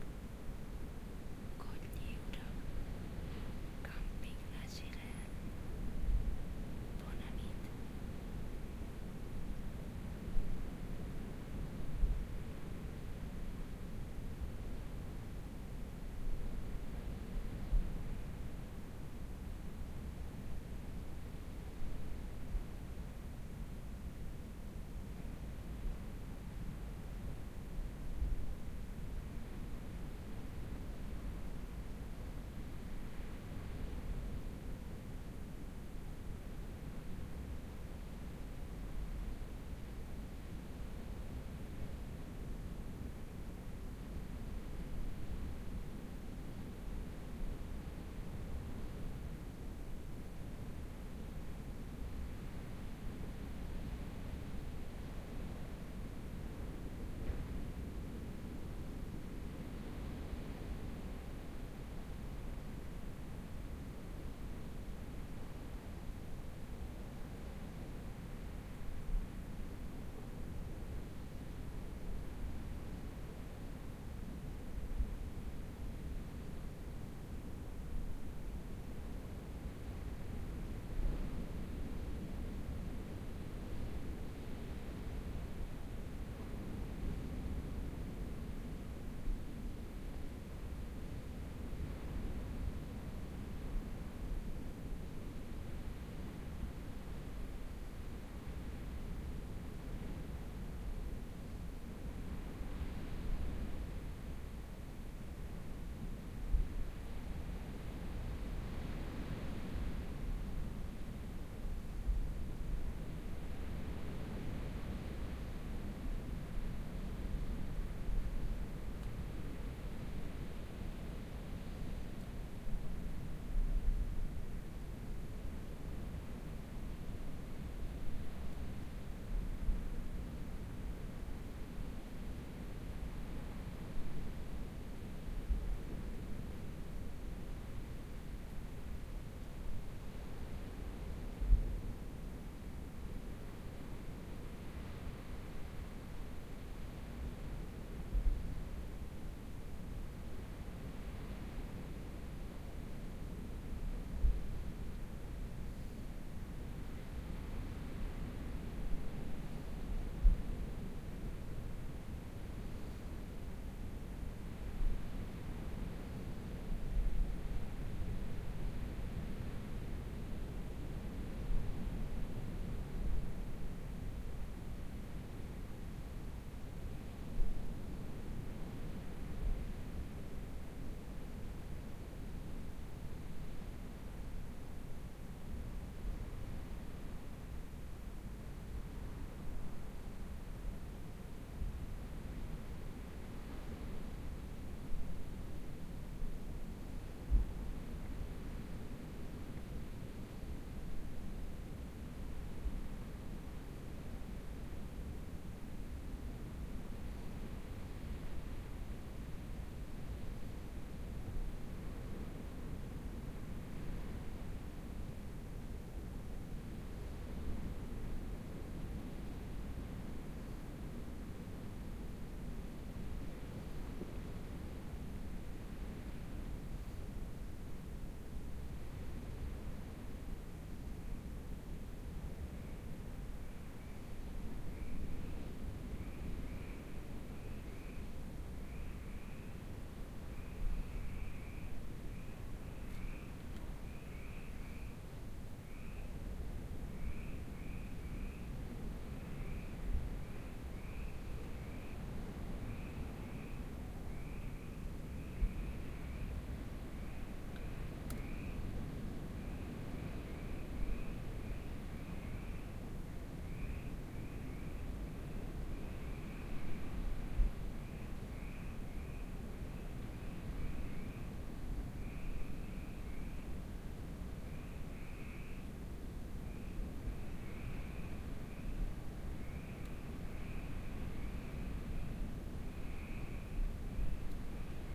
2006 04 16 colliure inside tent at night
At night in Colliure, France, I recorded the ambience we heard from within our tent. Ingrid speaks the small intro. You can heard frogs, wind and the waves crashing on the beach a bit further. The location was quite amazing.
collioure, colliure, field-recording, frogs, night, sea, tent, waves